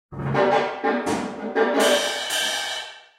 Stereo Drums Effect Room 2
Some of my drum recordings stereo mixed. test
cymbal, cymbals, drummed, drumming, drums, room, roomy, snare, stereo